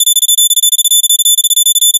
simple digits beep signal
beep, digits, hi-tone, signal